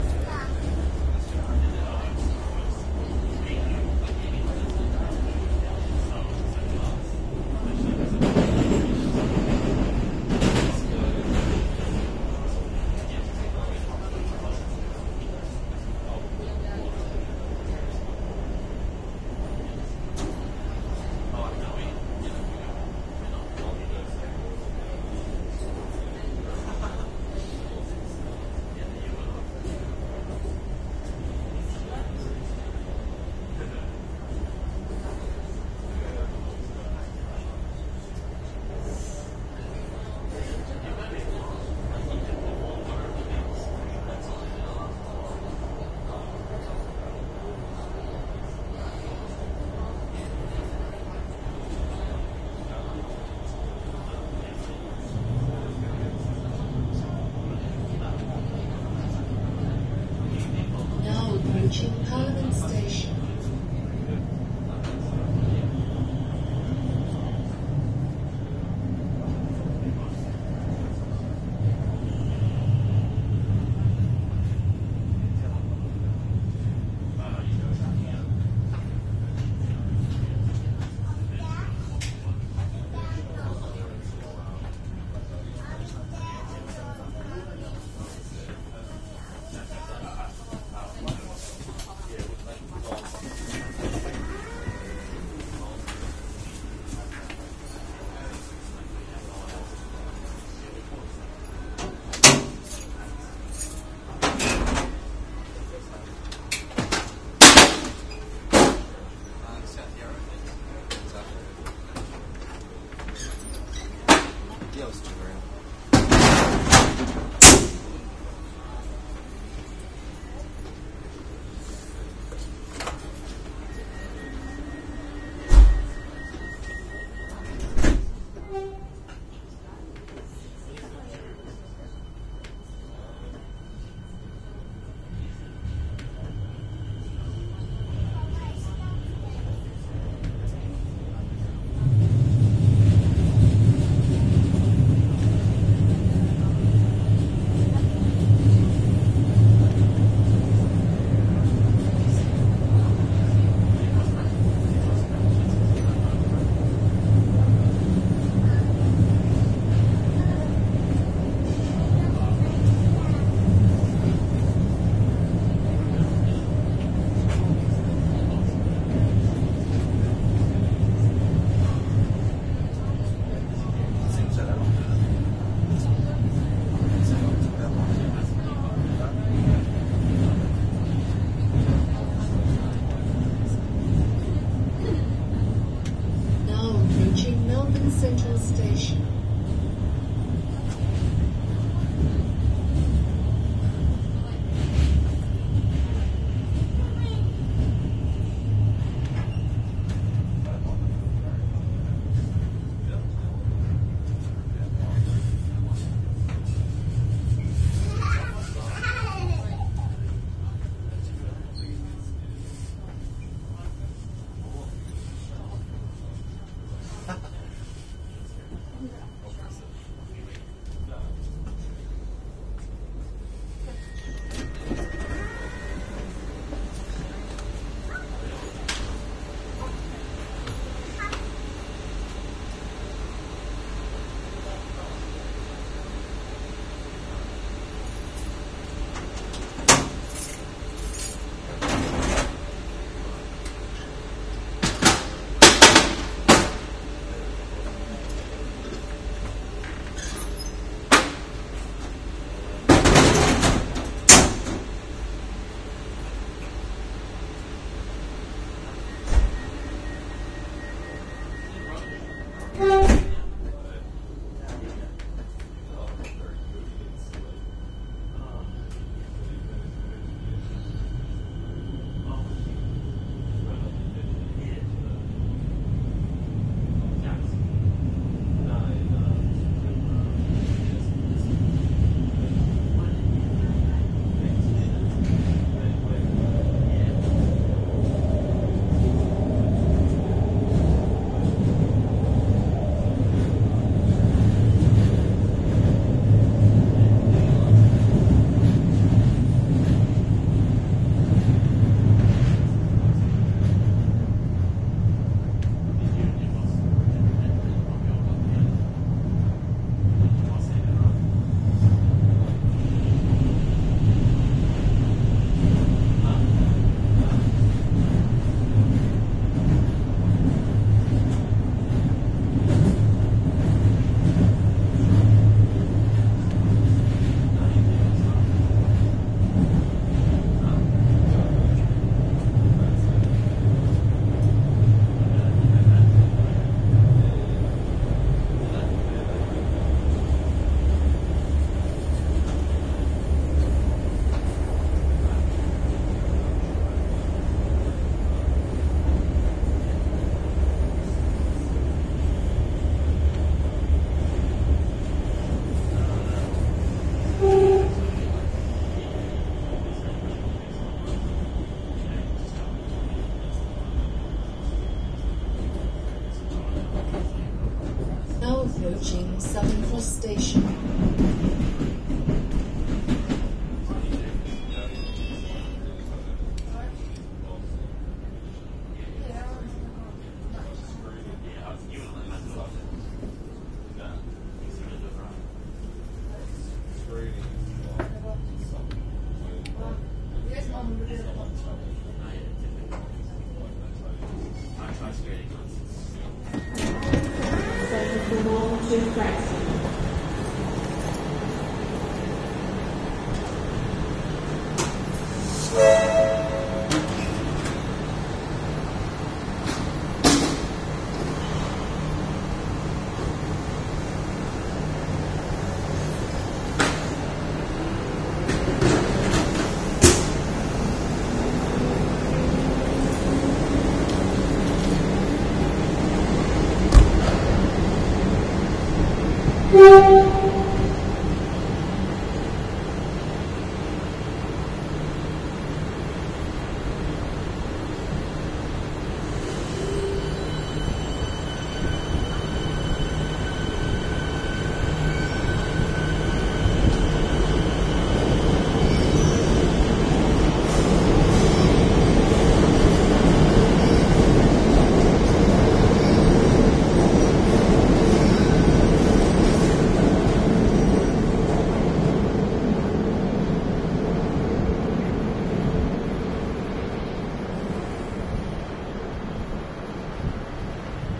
Riding a train through the city loop on a weekend ( express past Flagstaff ) in Melbourne, Victoria AUSTRALIA.
The loud banging heard at the stations is the driver pulling out the ramp to allow passengers in wheelchairs to board.
Also you may notice a delay as the train departs Southern Cross. This was because to guys held the doors open preventing the train from departing.
announcement, australia, melbourne, melbourne-central, parliament, platform, ride, southern-cross, station, subway, victoria